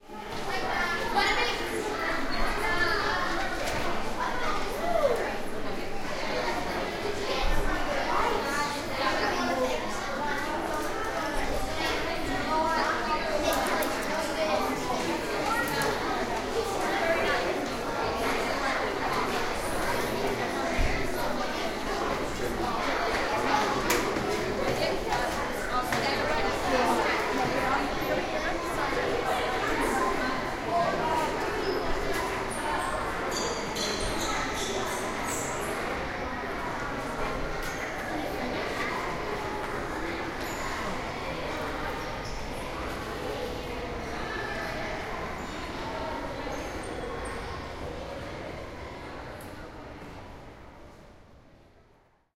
Large Hall Ambiance with School Children
A large group of school children are gathered in a library entrance hall. It's a large reverberant space. I walk up some stars away from the crowd and the reverberence changes. A child discovers they can make squeaking sounds with their shoes (annoying, unless you are recording, then it's great :).
binaural, ambiance, school-children, reverberant, crowd, people, field-recording